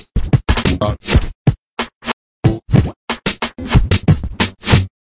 92bpm QLD-SKQQL Scratchin Like The Koala - 015
record-scratch, turntablism